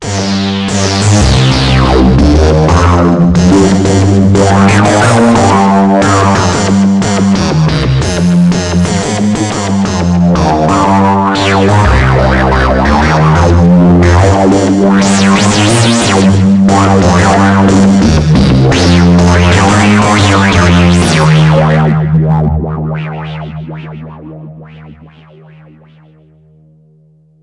Nord Lead 1 Bass 5 Trail Off
Nord Lead 2 - 2nd Dump